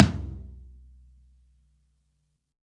Low Tom Of God Wet 008
low, drum, kit, realistic, set, drumset, tom, pack